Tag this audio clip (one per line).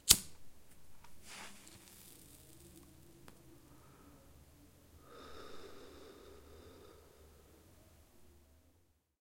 tascam
cigarro
cigarrete
smoking
drug
joint
dr40
field-recording
handheld-recorder